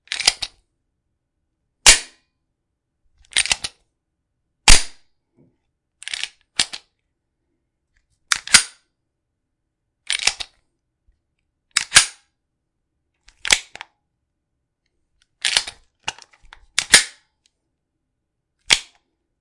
Racking the slide of my 9mm Taurus G2c. Recorded indoors using a Blue-Yeti microphone. Cleaned in Audacity.